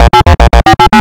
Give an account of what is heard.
just some fast and short digital beeps.
digitally-made, loud, short, beep, digital